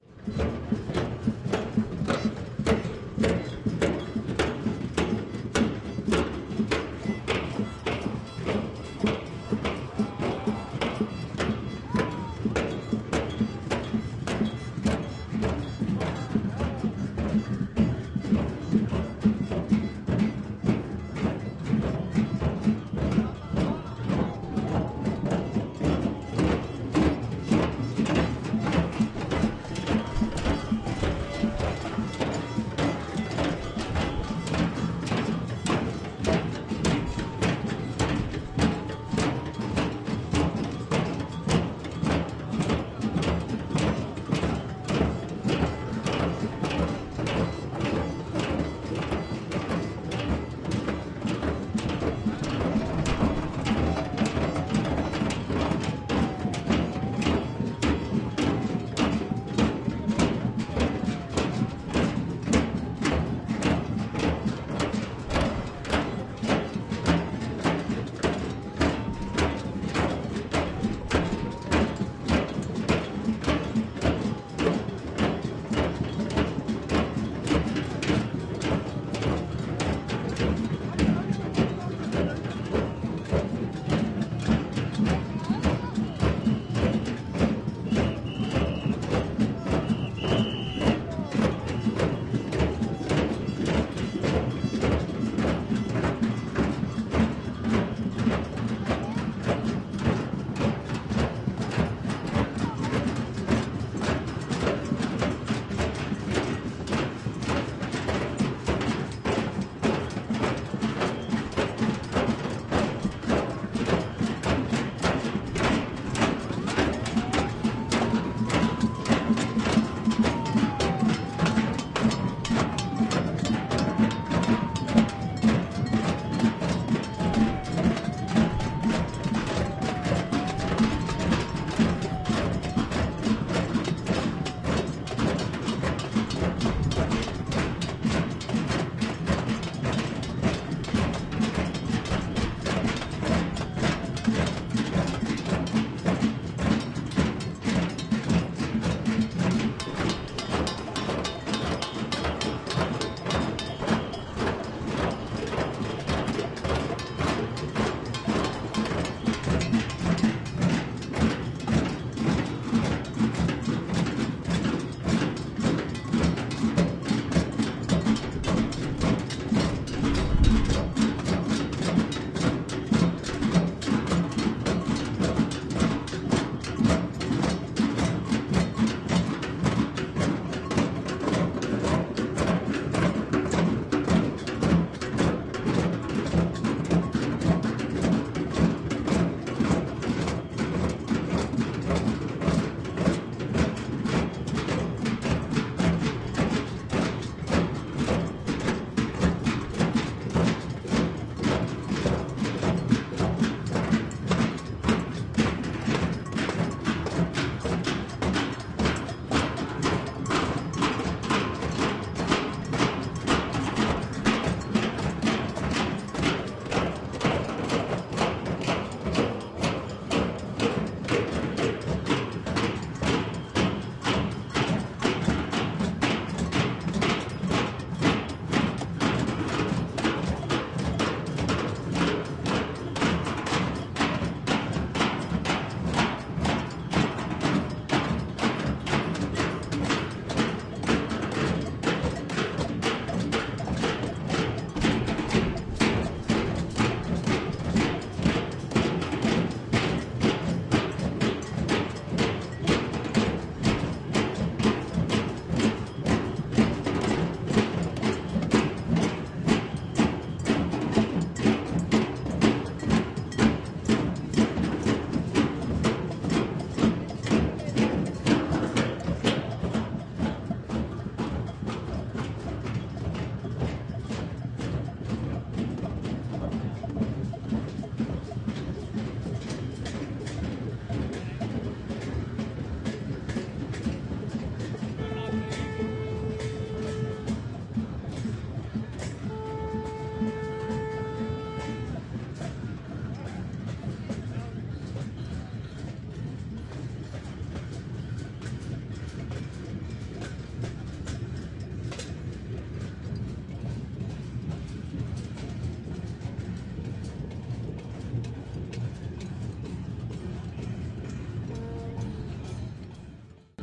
Government protest in Reykjavik Iceland at parliament building in Autumn 2010. It was a lively protest.